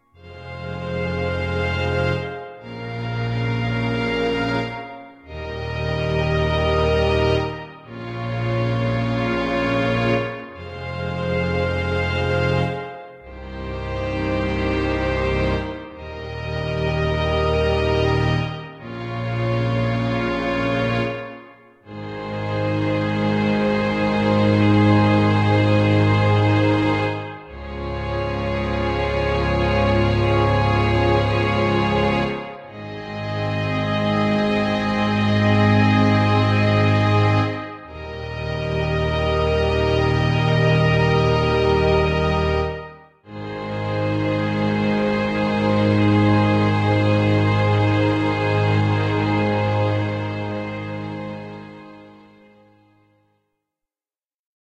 The Dramatic Music
Made in GarageBand.
It starts out a bit dramatic or slightly tense, then becomes just "sad"...
but it's 100% cheesy!
This is music based on the "Cheesy Trying-to-be-a-tearjerker Drama" music. Same key (A flat major) as the original, but different recording method (mic to phone vs. line in (this one)), and now has a proper ending. These are for you who just want the violin chords in the background, or just don't want to hear my horrible talking. Also, it is a bit lower-pitched (doesn't have that high chord) so it could just be sad.
Anyway, there is some obvious editing towards the end. I screwed up on the last chord (A flat major) and re-recorded it.
(This is quite long, but it is just an alternate soundskit for those who want to record their own dialogue, not a song.)
sad, film, drama, string, strings, melodrama, instrument, cinematic, garageband, movie, narm, tense, cheesy, orchestra, soundskit, a-bit-cinematic, soundskits, music, tearjerker, dramatic, bad, slow, background, chords, violin, suspense